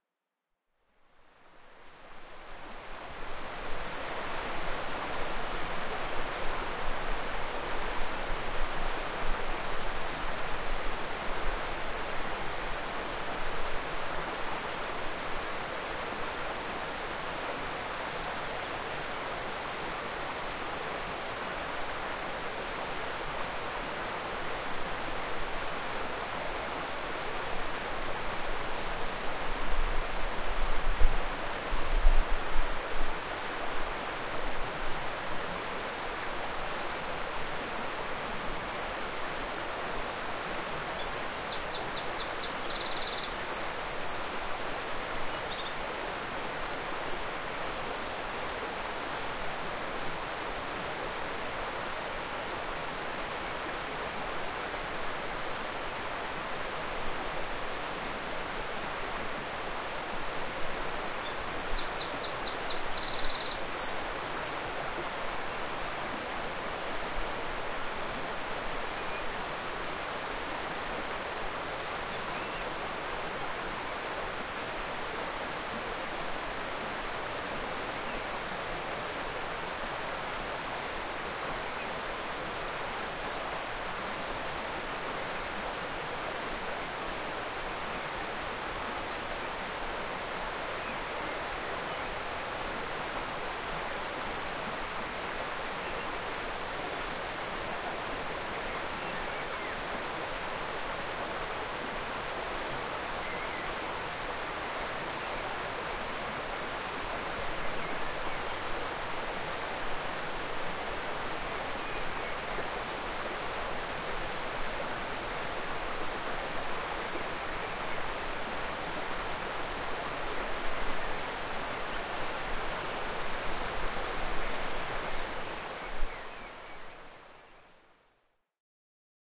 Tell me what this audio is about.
River Frome
Recorded in June 2015 just upstream from Scutt's Bridge, Rode. Water falling over weir at site of old mill. Some birdsong.
birds, nature